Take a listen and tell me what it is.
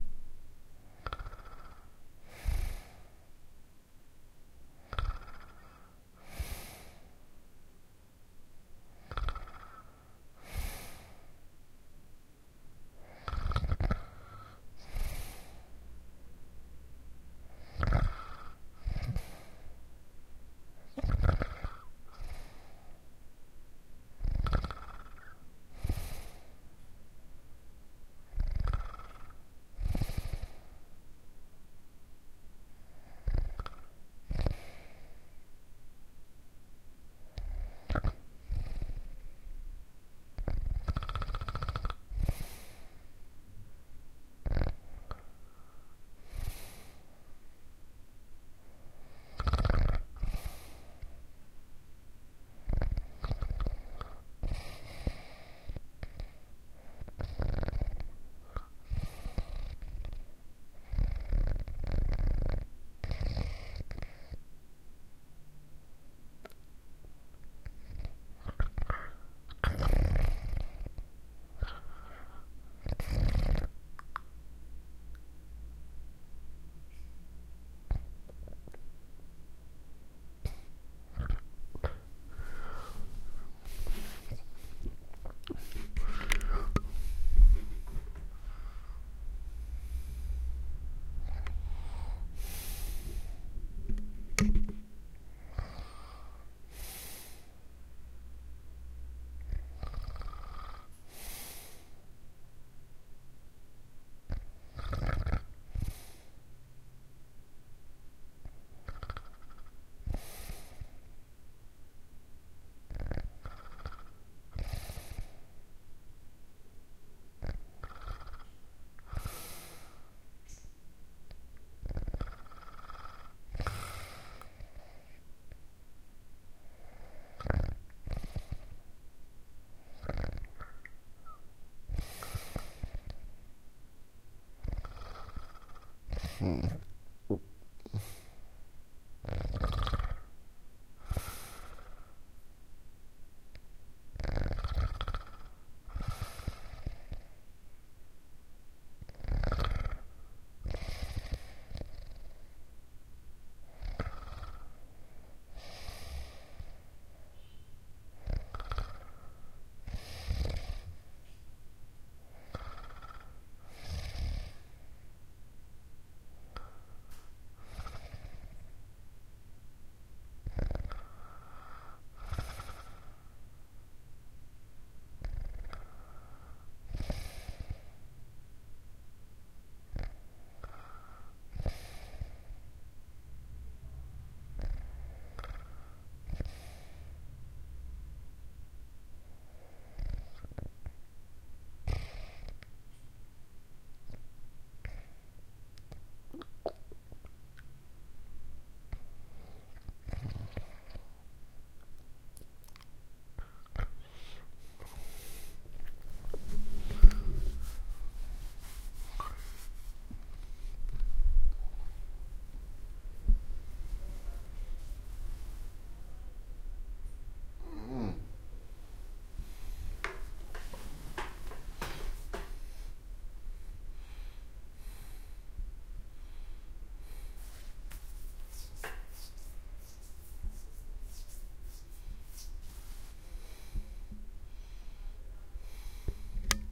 I couldn't sleep because my friends snoring was making me laugh